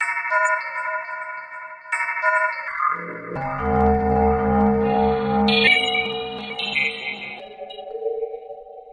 bell/vibe dubs made with reaktor and ableton live, many variatons, to be used in motion pictures or deep experimental music.